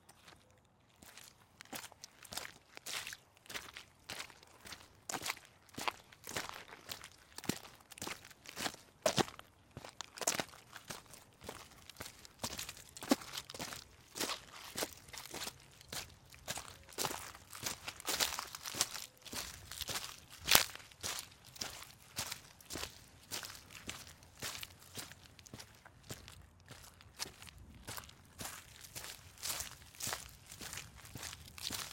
FOLEY Footsteps Wet Gravel 001
I recorded myself walking on some wet gravel, with leaves and foliage mixed in. Good squishy sounds and water sloshing sometimes. Nice sounds.
Recorded with: Sanken CS-1e, Fostex FR2Le